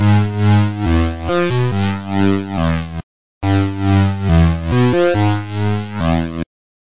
oscilation4step
dubstep synth that oscillates every fourth beat at 140bpm. to be used with bass wobble from this pack.